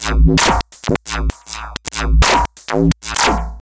Drumloops with heavy effects on it, somewhat IDMish. 130 BPM, but also sounds good played in other speeds. Slicing in ReCycle or some other slicer can also give interesting results.